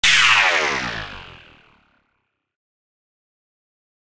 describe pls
Lazor-Huge-Hi2
A cheesy laser gun sound. Generated using Ableton Live's Operator using a pitch envelope and a variety of filtering and LFOs.